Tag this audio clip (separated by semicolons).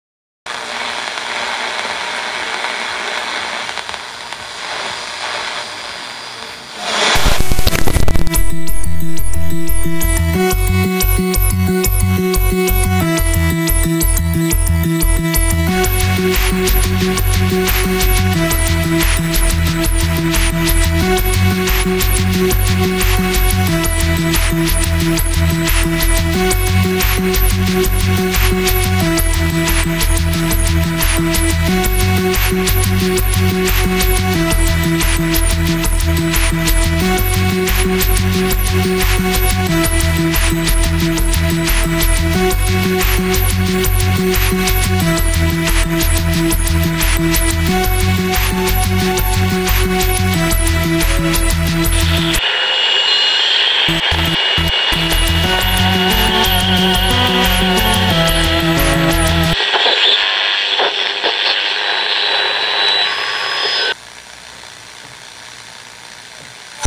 wave; star; radio; sounds; future; space; SUN